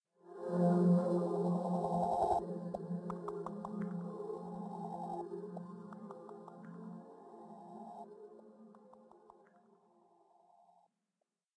Echo Pad Lofi
Ran my original sample thru RC20, tweaked some knobs, added eq for the lofi touch.
I believe i Used my personal rc20 preset to give it the crunch. I followed up with this resample using my lotus echo pad.
creation, design, dream, effects, gigantic, lofi, lofisample, pad, rc20, reverb, sound, sounddesign, space, tech